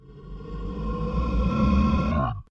necro-spawn - B
necromancer spawn sound
necromancer, sound, spawn